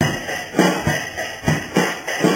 Audio from a video of a friends kid on real drums- basic beat 1.
dither, drum, lofi, loop